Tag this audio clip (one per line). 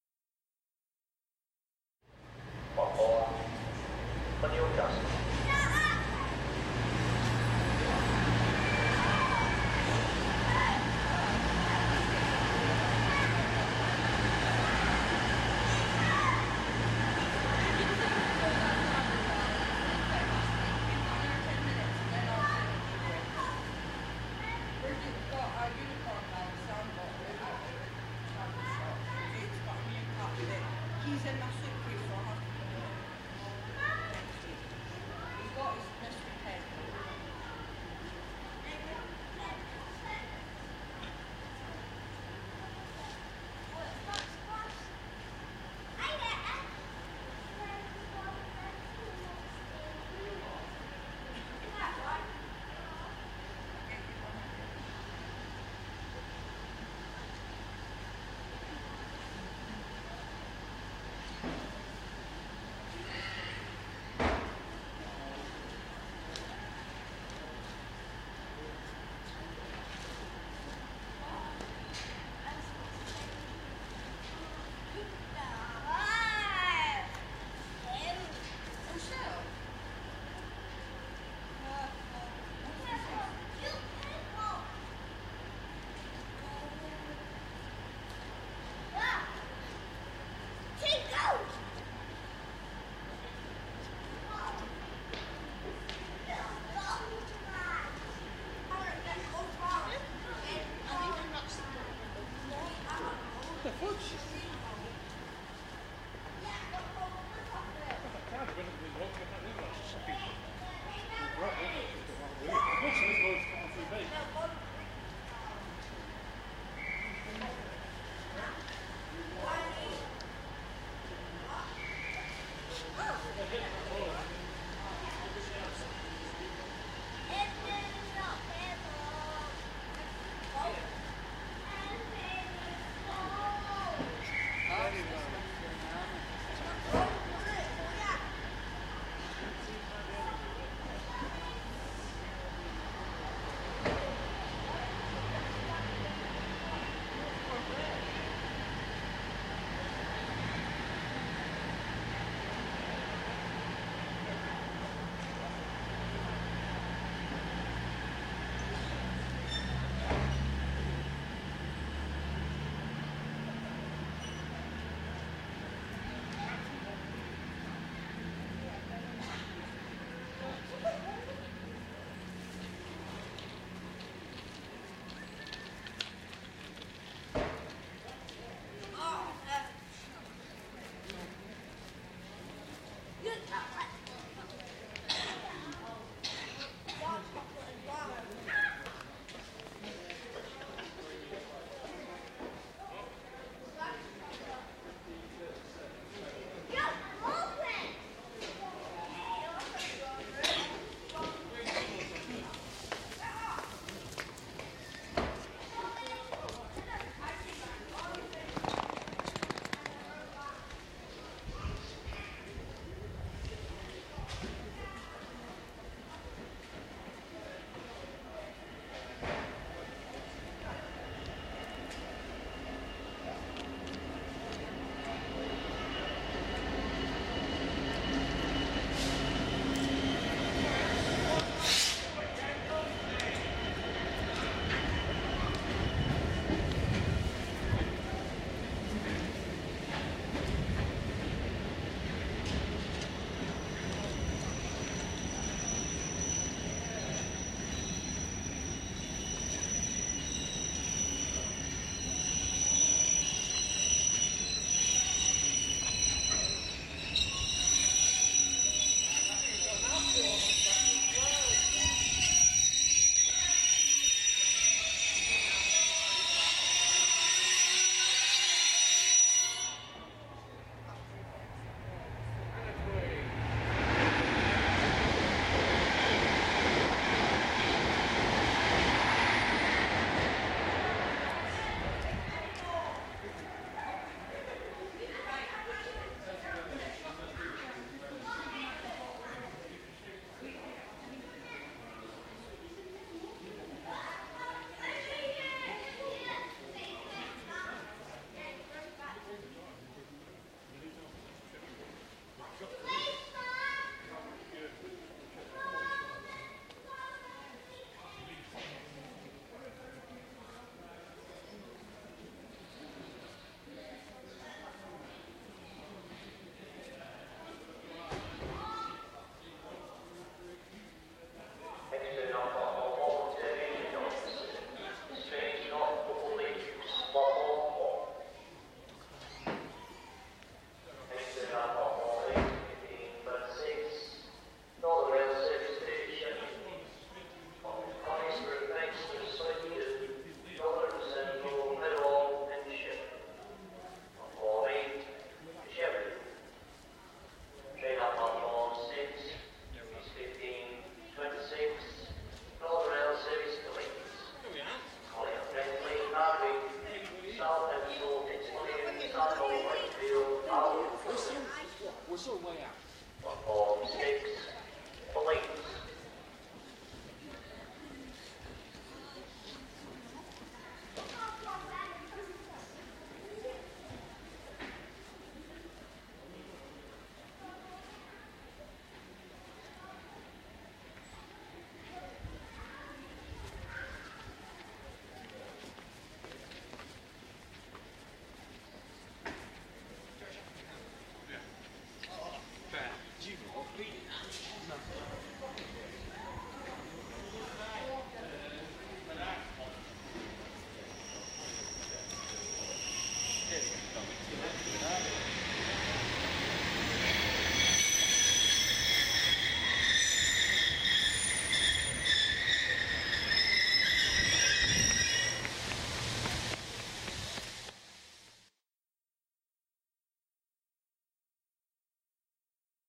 ambience
announcement
arrive
depart
departure
diesel
diesel-train
doncaster
engine
field-recording
footsteps
listen-with-headphones
luggage
male
pacer
passenger-train
platform
rail
railway
railway-station
station
train
trains
voice